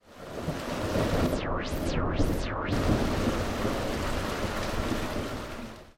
BRUYAS Charlotte Rainy
I recorded the rain falling. Then, I added an opening and closing background to make the sound more aesthetic. From the first second, I added a wahwah effect to give originality to this sound.